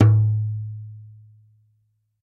Doumbek Doum
Doumbek One-Shot Sample